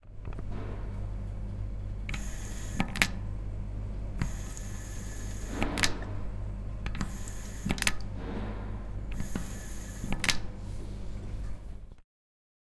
STE-002 Fountain Button + Water falling
Actioning the button of a water fountain from the university + the sound of water falling